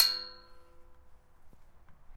Teng pole hit
Recorded with a Sony PCM-D50.
Hitting a metal pole.
metal, pole, hitting, hit, iron, sound, percussive